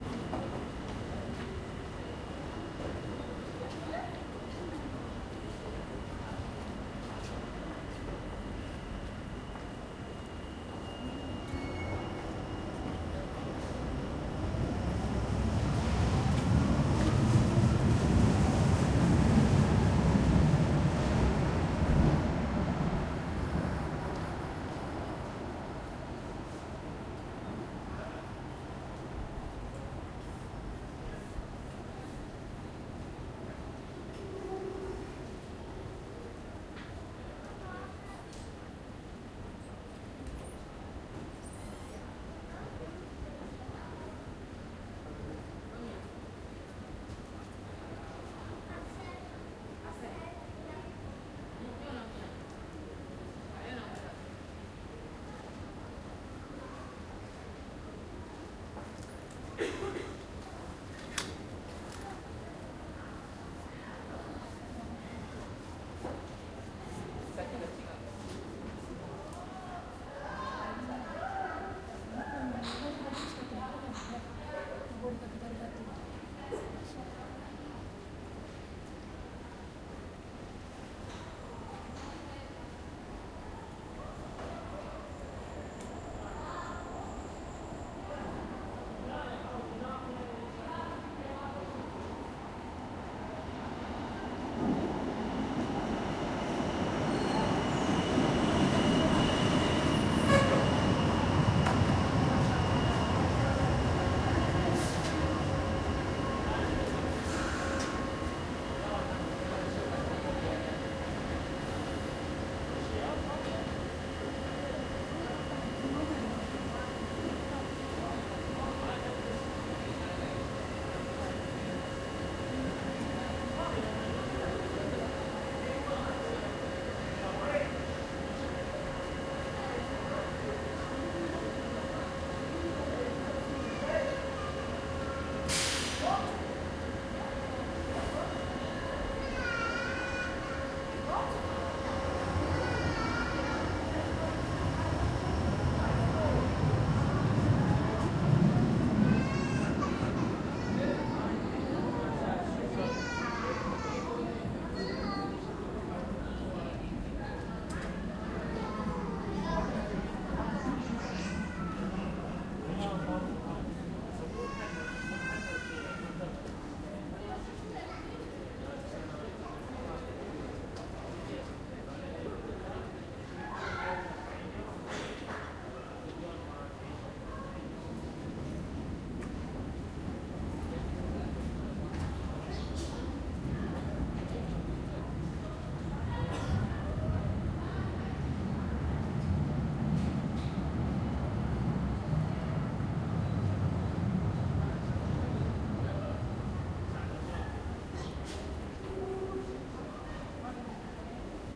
Subway platform at Duesseldorf main station, Germany. Trains entering/leaving, people passing by.
Recorded with a Zoom H1.
Am U-Bahnsteig Düsseldorf Hauptbahnhof. Bahnen fahren ein, Menschen kommen und gehen.